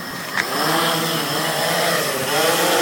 A drone launching. Recorded with my iPhone. Amplified in Audacity.